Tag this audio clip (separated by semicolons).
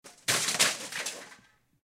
armor; legionary; cuirass; plate-armor; knight; metal; lorica-segmentata; foley